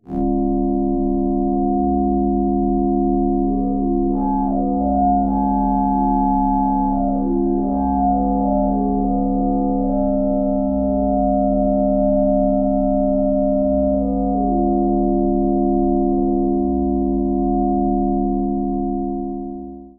A sound created in Giorgio Sancristoforo's program Berna, which emulates an electro-acoustic music studio of the 1950s. Subsequently processed and time-stretched approximately 1000% in BIAS Peak.
ambient, Berna